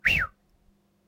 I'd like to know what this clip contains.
Ball Thrown
This sound is meant to represent the noise an object might make when moving through the air after being thrown.
The noise was made by my own voice, but has been raised in speed & pitch so that it's less obvious.
ball, thrown, whoosh